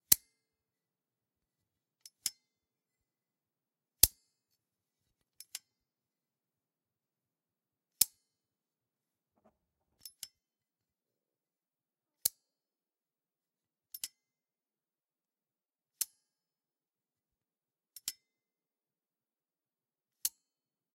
Scissor like metal tool. click. Tascam DR-100.